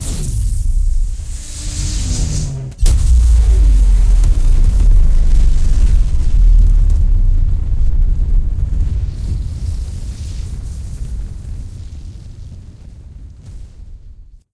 Huge rocket motor startup
This is a sound of a huge and heavy rocket starting up and lifting off.